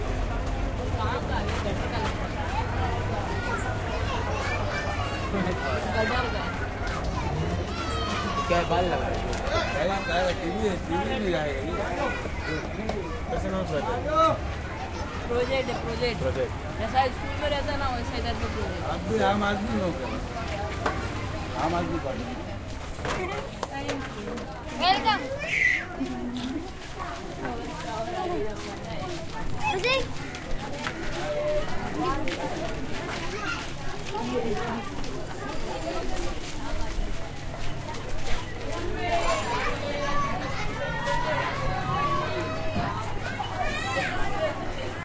crowd int ext alley medium close voices and kids playing footsteps India
alley, close, crowd, ext, footsteps, India, int, kids, medium, playing, voices